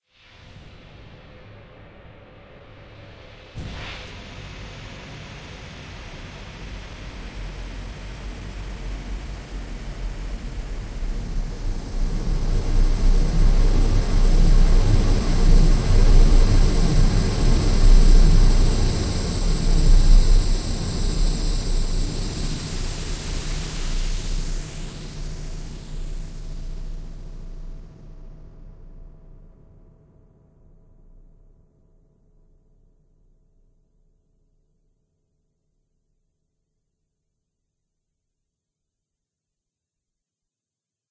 The Biggening Ray
A growth-ray for making things all big and stuff.
Created by recording my actual real growth ray which I used to make some rats massive so that they could pull my carriage like horses.